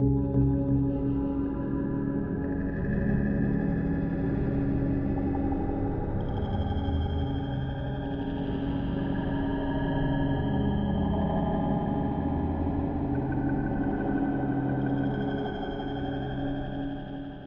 ab oblivian atmos
sounds like emptiness oblivian